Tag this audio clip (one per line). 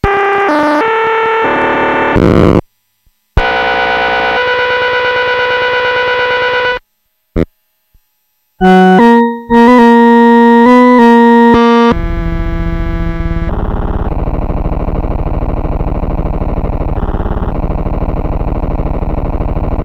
casio
glitch
if-your-crazy
noise
old
scenedrop
school